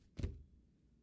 Light Thud 4
Recorded on a Tascam DR-100 using a Rode NTG2 shotgun mic.
Versatile light thud for subtle sound effects.
subtle, hit, drop, small, light